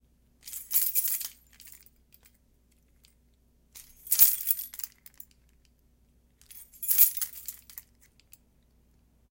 Keys Foley
Foley recording of playing with keys, and throwing them around to get the metal-to-metal sound.
clang, cling, foley, jangle, jingle, jingling, key, keychain, keyring, keys, metal